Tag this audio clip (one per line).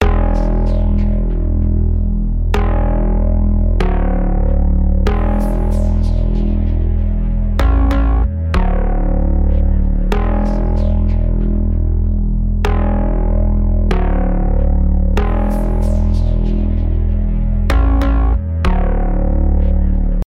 bass
chords
electro
loop
synth